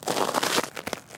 Icy snow in Sweden.
Recorded with Sennheiser MKH 416.